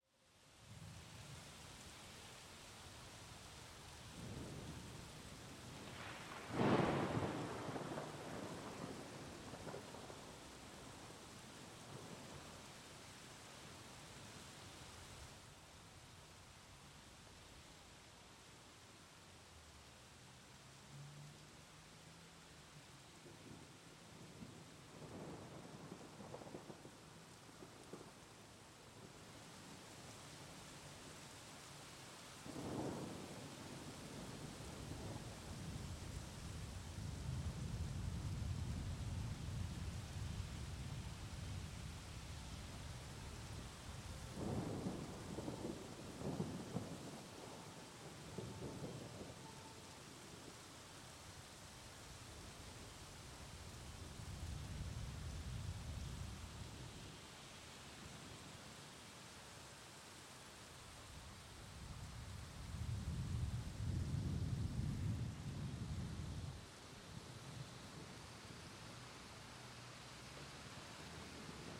orage+pluie (sm58)
A storm in Paris recorded on DAT (Tascam DAP-1) with a Shure SM58 by G de Courtivron.
rain, storm